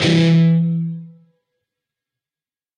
Dist Chr Emin rock up pm
A (5th) string 7th fret, D (4th) string 5th fret. Up strum. Palm muted.